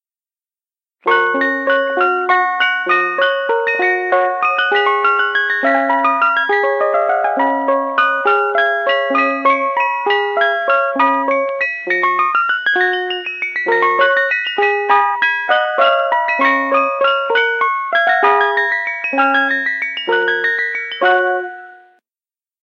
The Band Played On Clockwork Chime
I'm back with some more clockwork chimes. This one is from an old Nichols Electronics music box called the Mark IV. This particular song has been edited by me in Audacity so that all the bass below 200HZ is cut, and the highs roll off at 16K, so that this song is now suitable for playing on outdoor PA horns. Use this song for ice cream vending if you want to. Enjoy.
Chimes, Ice-cream-vending, Old